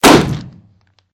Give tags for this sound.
bullet-hit
fire
firing
gun
projectile
rifle
rifle-hit
shoot
shooting
shot
shot-gun
shotgun
shotgun-hit
weapon

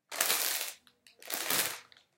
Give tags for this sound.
arrugar
crease
plastic
transition

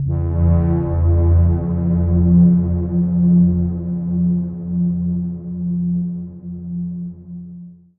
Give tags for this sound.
pad
ambient
reaktor
multisample

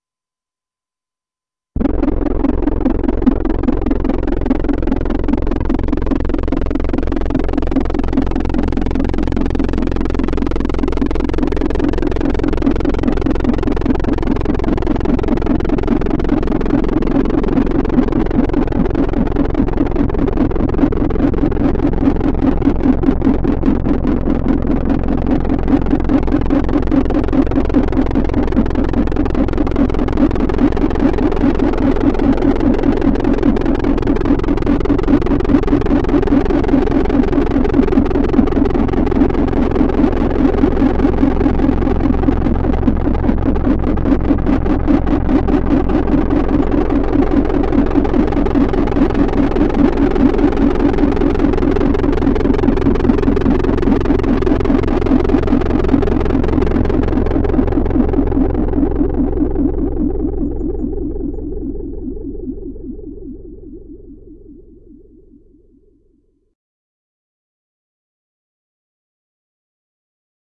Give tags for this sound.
alien
ambeint
blast
computer
damage
digital
drone
electric
electronic
experiment
experimental
laboratory
laser
modulation
robot
sci-fi
signal
sound-design
space
space-war
sweep

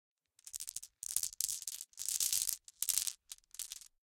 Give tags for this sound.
marble
pour
glass
shuffle
hand

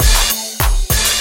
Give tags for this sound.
beat
dance
progressive

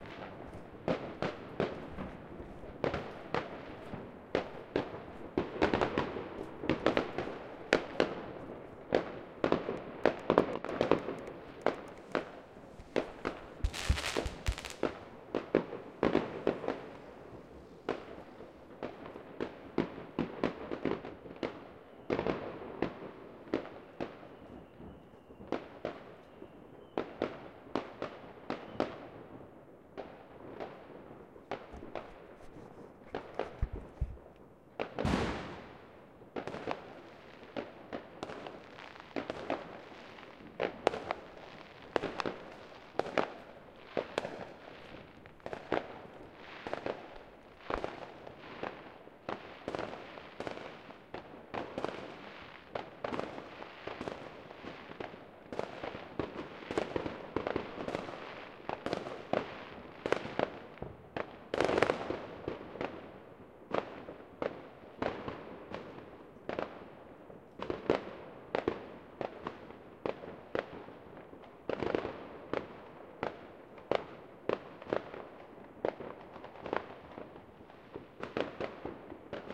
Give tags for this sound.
fire-works
Fireworks
newyear
bang

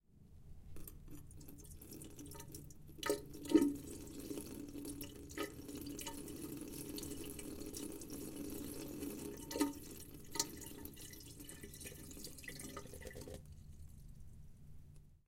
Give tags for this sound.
Point
Elaine
Koontz
Park
Field-Recording
University

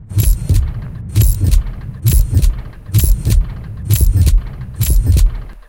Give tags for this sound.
heart
heartbeat
industrial
machine

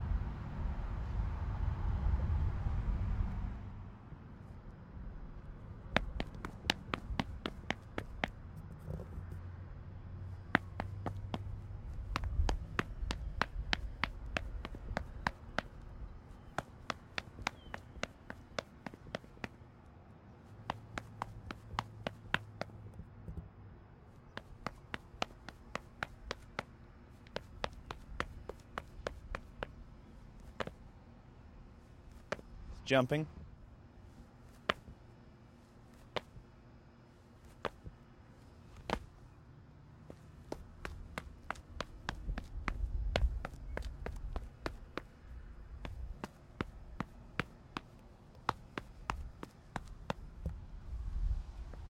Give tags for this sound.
shoes
walking
Run
Concrete
exterior
outside
Sneaker
running

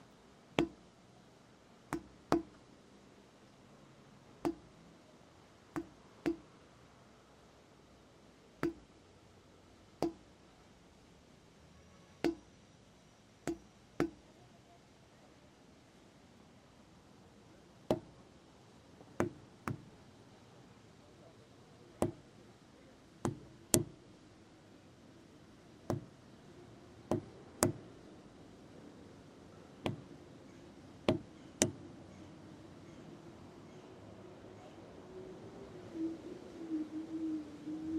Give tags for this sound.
street-noise
traffic
sound
street
urban

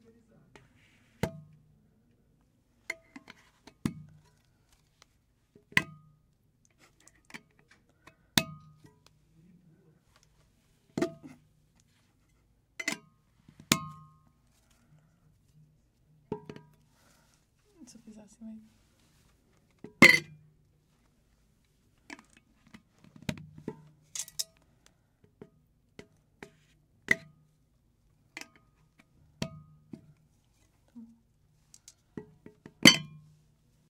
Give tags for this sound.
4maudio17
can
closingcan
openingcan
uam

